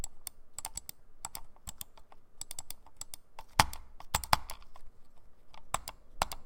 mouse clicking
my gaming mouse
click, mouse, clicks, computer-mouse, clicking, mouse-click